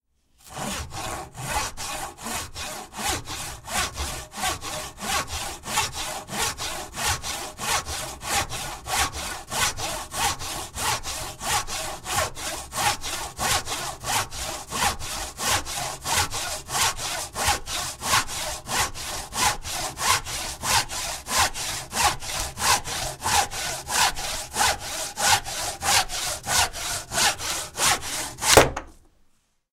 Wood saw cutting wood